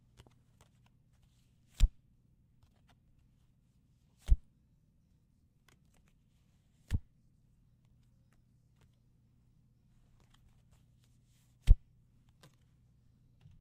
a playing card being drawn near mic